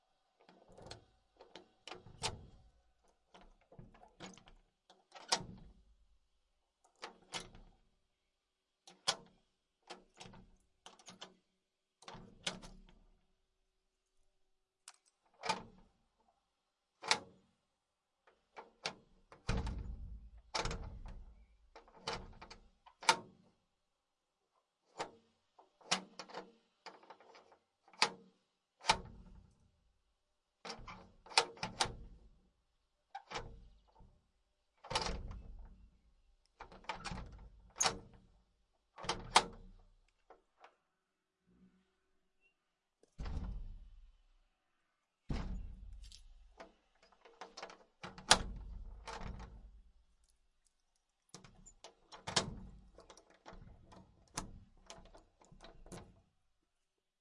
key in antique lock unlock with handle in wood shutter door turn twists squeaks clicks latch slide unlock mechanism various on offmic
lock, antique, key, handle, unlock